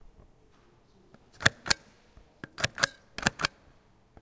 The sound we recorded belongs to a rubberstamp while marking a paper. Was recorded closely with an Edirol R-09 HR portable recorder, in the desk of the upf poblenou library.